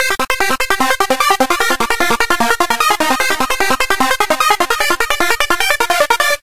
Riser 9 Flicker
Synth sequence with delay. 150 bpm
150-bpm, beat, distorted, hard, melody, phase, progression, sequence, synth, techno, trance